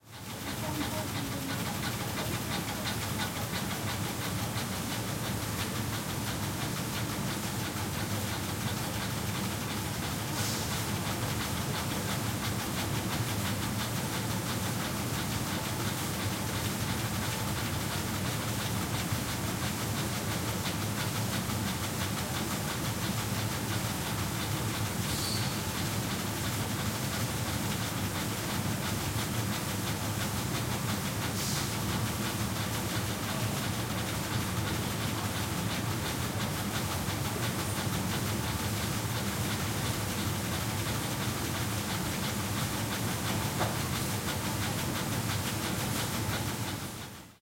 FACTORY machines spray with workers - drone
Here is an ambient sound recorded in a tanning factory next to a spraying machine
ambient, noise, soundscape, spray, atmo, general-noise, drone